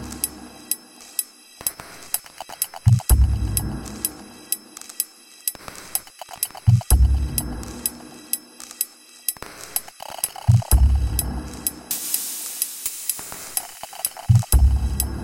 Percusive loop at 126 BPM made in Live 8